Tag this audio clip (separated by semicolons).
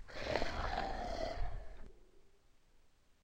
Roaring; Growl; Beast; Creature; Animal; Snarling; Monster; Roar; Wolf; Bear; Growling; Snarl; Dragon